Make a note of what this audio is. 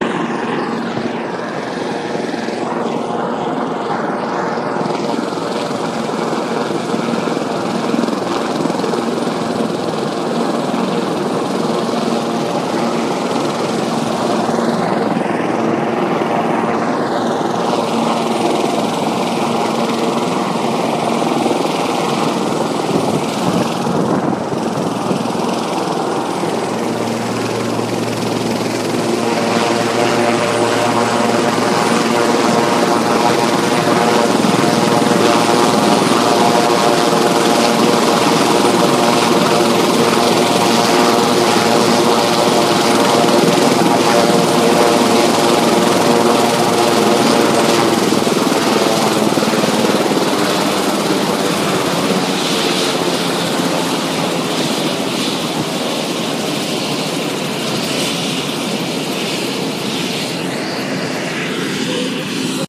A helicopter gradually coming closer and then cutting the engines.
Helicopter, Flight, Aircraft, Chopper, Plane